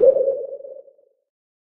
Submarine Echo 1
A one-shot FX that sounds like a submarine siren submerged underwater. I believe I made this thing using old plugins from Cycle '74.
water, submerged, echo